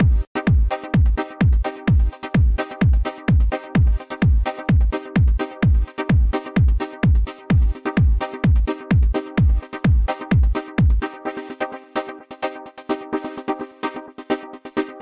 Sinthy stuff
A sound I constructed while fooling around the Predator synth inside of FL Studio.
ENJOY!
If you do decide to use this in any of your productions, don't forget where you got it from.
Thanks in advance.
fl, guitar, house, like, predator, sample, studio, Synth, trance